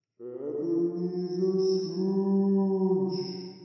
ebenezer scrooge 5 211115-195922
For the 2021 production of Christmas Carol I wanted to add some special effects. To create a ghostly voices saying ebenezer scrooge I recorded 10 different cast members, then I used audacity to add a little reverb and filter the voice using the frequency transform of a recording of wind. Then I played the voices while the wind was blowing.
carol, ebenezer, christmas